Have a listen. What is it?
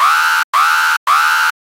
3 alarm long c
3 long alarm blasts. Model 3
alarm, futuristic, gui